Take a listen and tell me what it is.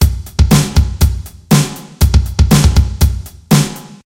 Syncopated Drum Groove
drum, groove, syncopated